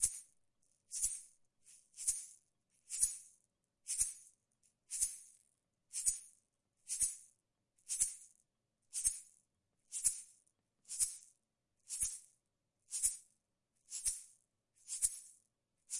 Shaker 4 - 120 BPM stereo dry
Original sound - shaker recorderd in stereo at 120 BPM with a pair of Rode NT5s
eggshaker, percussion, shaker